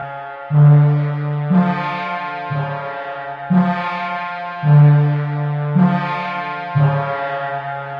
Horribly distorted horn sound
gloomy, processed, horn